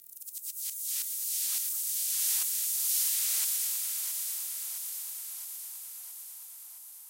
spin out
sweep, spot, fx